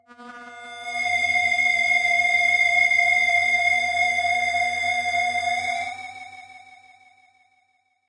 A simple but strange/eerie pad.